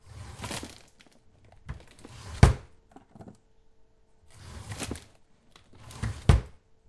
opening and closing a snack drawer a few times
open, snack, thud, bags, crinkle, close, slide, drawer